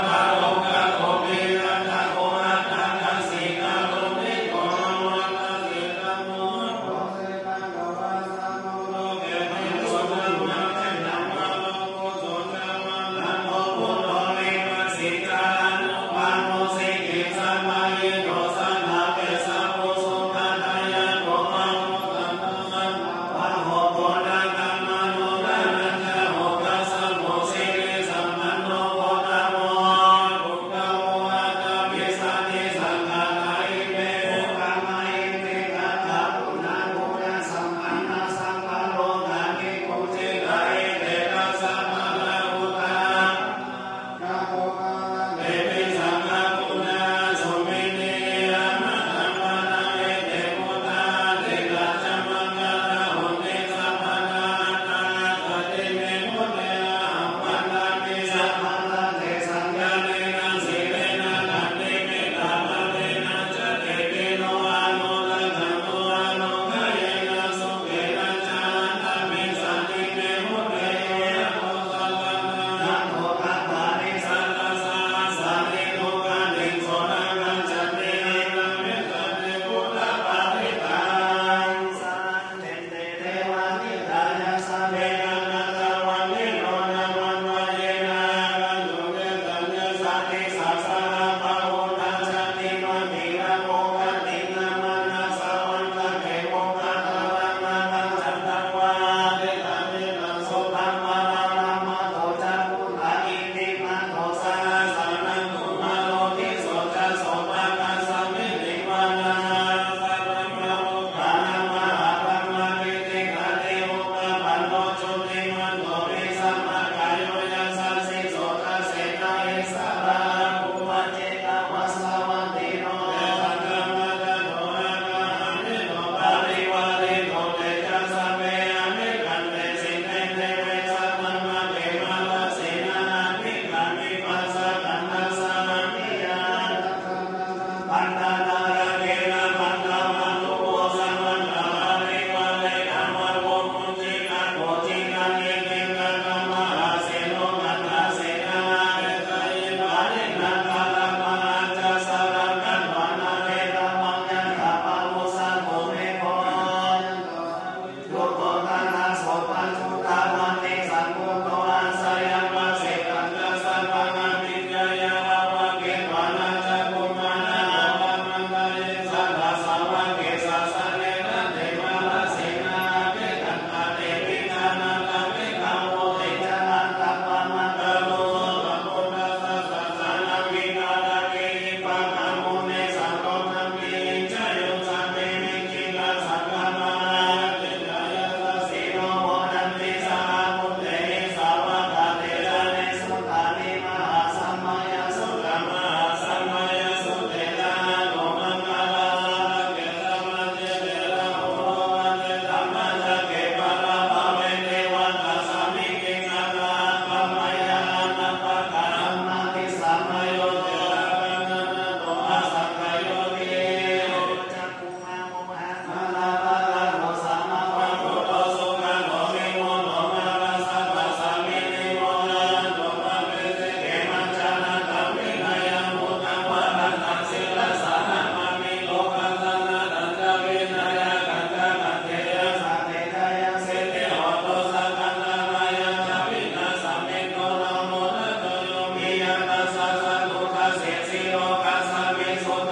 Thailand chanting Thai Buddhist monks in small temple, prerecorded on PA but very convincing +some live monks chanting along periodically
Buddhist, chanting, field-recording, monks, temple, Thailand